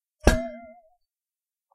Small flask stuck in a mug which i dropped on the floor.
interesting sound which i then recorded three times slightly different microphone settings.
Used Swissonic Digital Recorder with stereo mics.